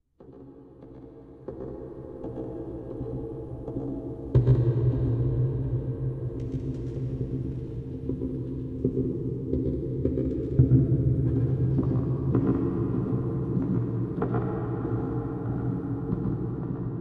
Sound of footsteps echoing indoors.
echo, Footsteps, ReaPitch, Stereo, Verbate